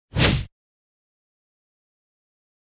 Woosh - ShortAttack
air, attack, fighting, luft, punch, swash, swhish, swing, swish, swoosh, swosh, whip, whoosh, wind, wisch, wish, woosh